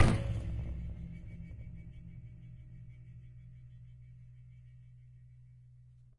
recordings of a home made instrument of David Bithells called Sun Ra, recordings by Ali Momeni. Instrument is made of metal springs extending from a large calabash shell; recordings made with a pair of earthworks mics, and a number K&K; contact microphones, mixed down to stereo. Dynamics are indicated by pp (soft) to ff (loud); name indicates action recorded.